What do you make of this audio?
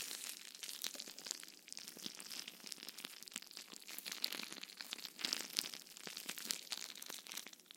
rubber anti stress ball being squished
recorded with Rode NT1a and Sound Devices MixPre6